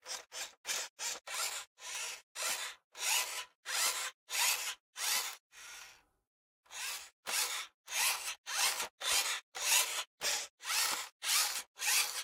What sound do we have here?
rc car wheel turn
Servo sounds from a remote control car.